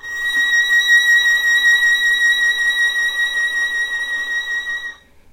violin arco vibrato